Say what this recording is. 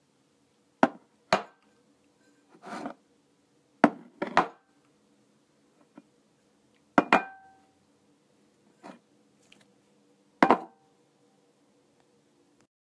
Glass Bowl Set
Setting and lifting a glass bowl on a wooden table.
set, glass, setting, bowl, wood, lift, down, table